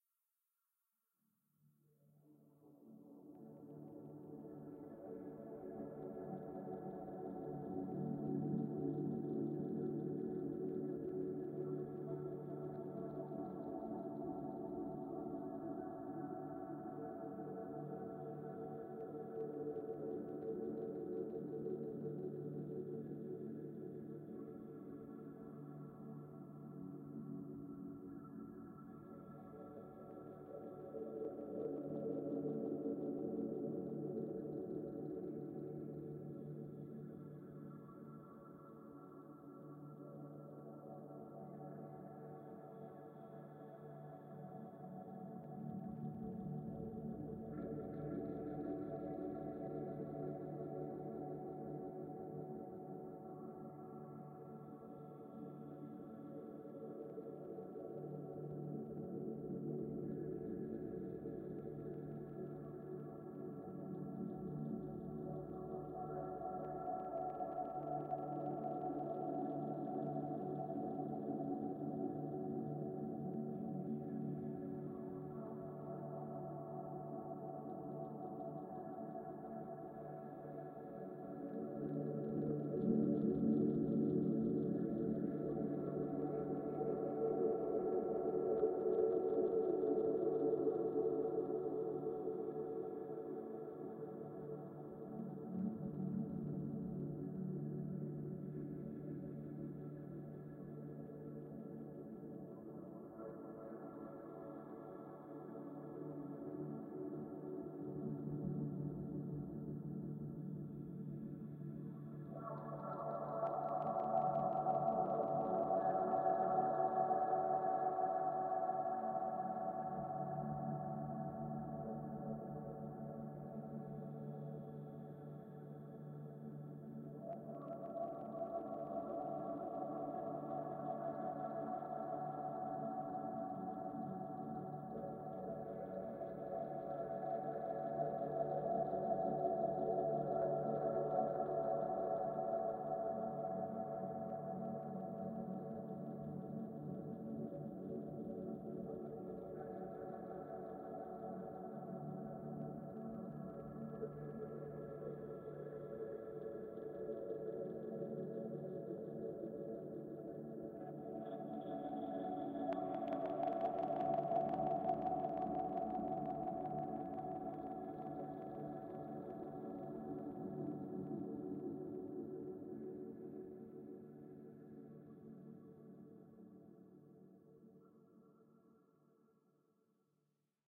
Dmaj-water pad1
A pad I created for my music. Used in "Elements" LP, track 2 "Water basin". In Dmaj.